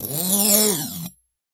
BS Scrape 22
metallic effects using a bench vise fixed sawblade and some tools to hit, bend, manipulate.